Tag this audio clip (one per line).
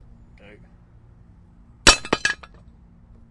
Clang
Clank
Axe
Metal
Drop